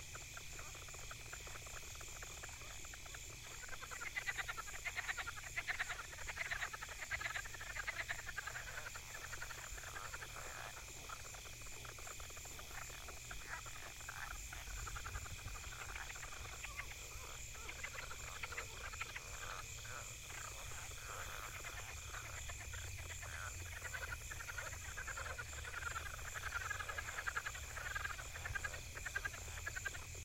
Frogs (lots)
A group of frogs in a small pond making all sorts of croaking sounds. Recorded with a Zoom H4N in Illinois, United States
field-recording, frogs, Illinois, marsh, midwest, nature, pond, swamp, toads